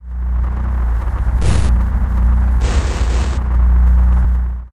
earth combined
This is a harshly manipulated file of rumbling that has been tweaked to the max by plug-ins and various noise making enhancers meant to simulate the sound of impacting. This, combined with the gentle rumble to accentuate
synthetic, stomp